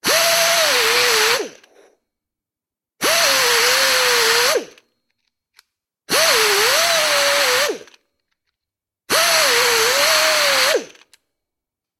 Straight die grinder - Atlas Copco g2412 - Grind 4
Atlas Copco g2412 straight die grinder grinding steel four times.
crafts, pneumatic-tools, 80bpm, motor, pneumatic, tools, work, 4bar, grind, straight-die-grinder, atlas-copco, metalwork, air-pressure, labor